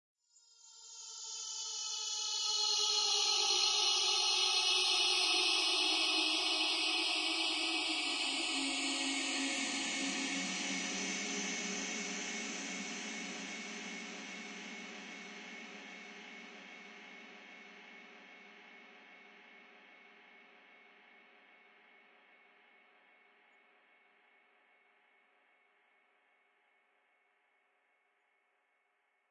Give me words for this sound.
sad scream fx
dark, scream